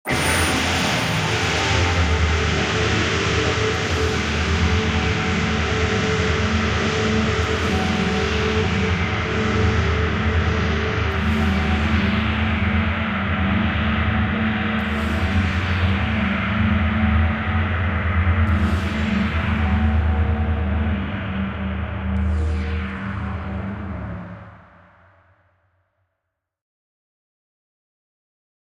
Time Travel Blast
A blast-off kind of sound with lingering swipes of laser effect.
Sci-Fi, Blast-Off, Travel, Futuristic, Space, Electronic, Time